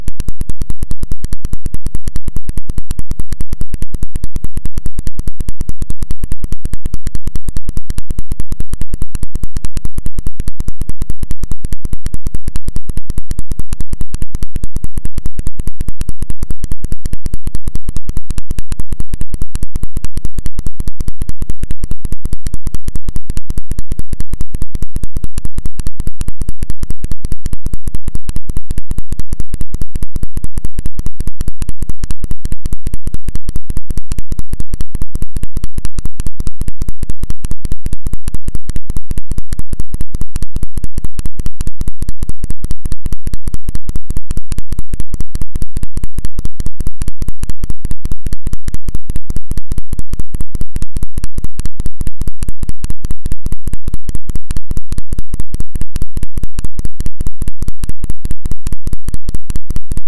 Click Dance 47
So when I launched Audacity within Gentoo Linux, a strange ground loop occurred. However, adjusting the volume settings within alsamixer caused the ground loop to become different per volume settings. The higher the volume, the less noise is produced; the lower the volume, the more noise is produced.
Have fun, y'all!
future, weird, sound, soundeffect, ground-loop, electric, sfx, strange, dance, digital, fx, freaky, loop, abstract, effect, glitch, electronic, sci-fi, lo-fi